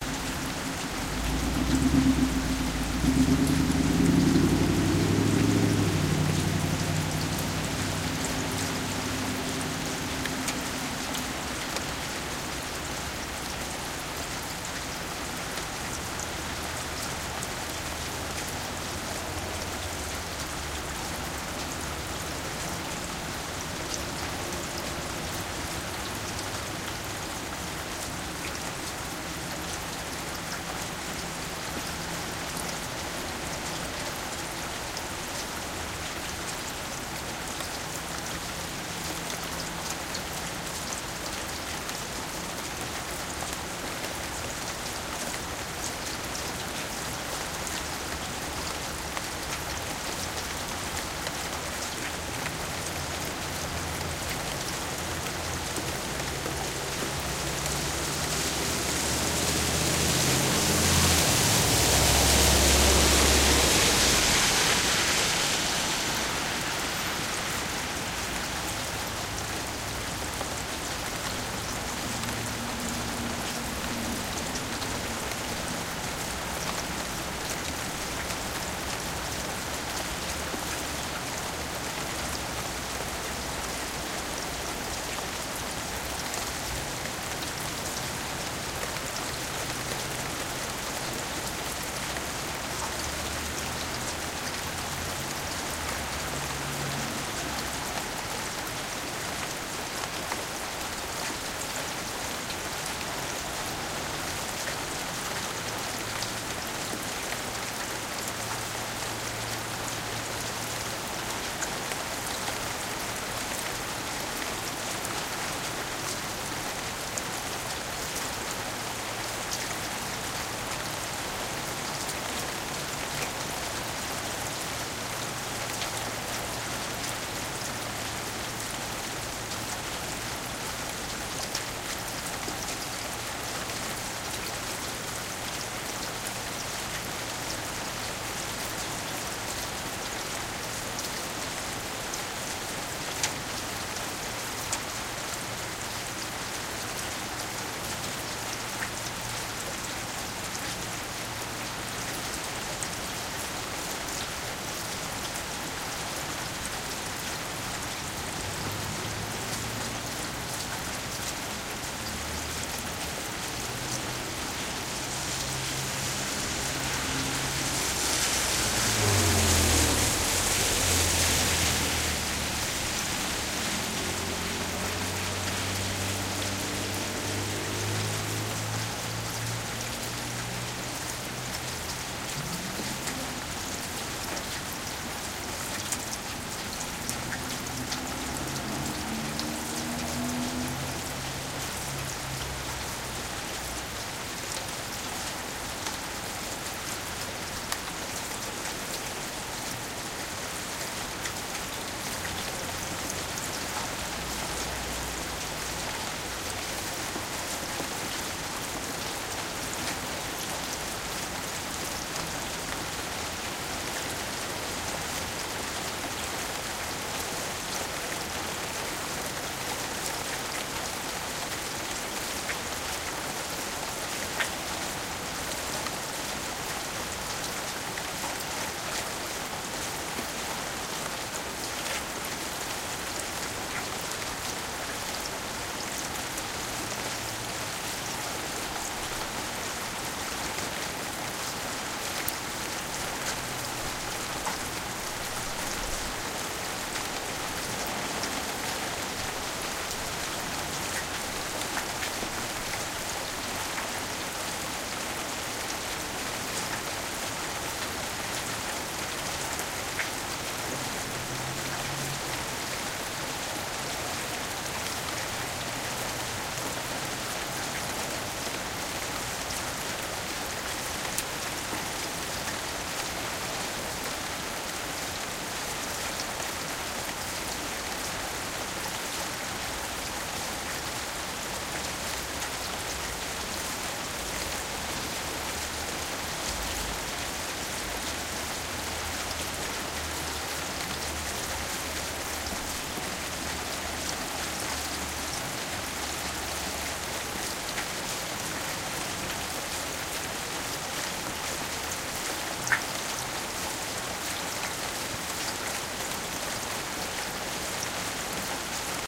Rain With Cars
It was raining one night so I used a Sony PX720 Digital Voice Recorder to catch some hopefully cool atmospheric type sounds. I placed the recorder next to my apartment window and stepped away for a few minutes. My window is situated right next to a rain-gutter so you hear the rain hitting the pavement along with the rain flowing down the gutter. You also hear four different cars drive by during the recording. Well that's about it hopefully these sounds are of some use to people.